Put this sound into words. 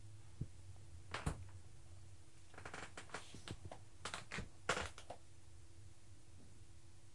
its just a recording of myself siting on a plastic chair